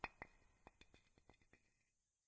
Sound of rocks or stones grating against each other, with reverb, as the sound someone walking in a cave might make with their footsteps.
rocks, cave, stones